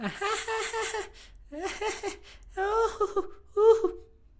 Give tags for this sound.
laugh
laughter
false

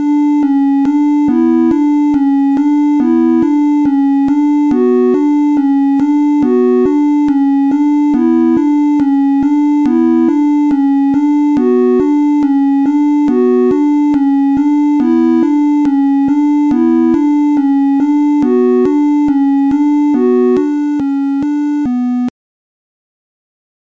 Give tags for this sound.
computer
game
science-fiction

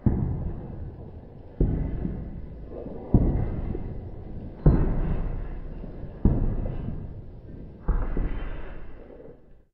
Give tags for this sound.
walk
running
foot
footstep
concrete
steps
walking
footsteps
step
feet

